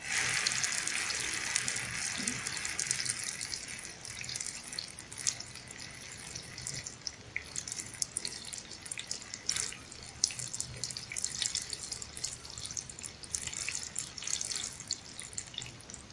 lluvia exterior fx sound effect